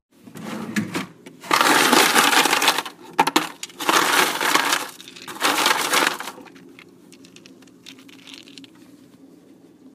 Freezer Ice Bucket
My hand grabbing ice from the cooler in my freezer, some clattering and scooping sounds as the ice cubes crackle off one another.
bucket, clatter, cold, crack, crackle, foley, freezer, frozen, ice, rattle, scoop